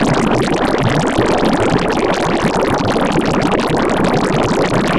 30k synthesized bubbles of exponential size distribution using "Sounding Liquids: Automatic Sound Synthesis from Fluid Simulation", Moss. W et al as a reference